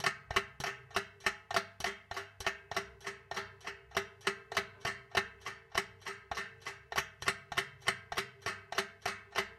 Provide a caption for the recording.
one clock ticking recording placed into washing drum and one clock ticking recording into plastix box. mixed together and maximize in cubase4, enhanced with wavelab6 @ home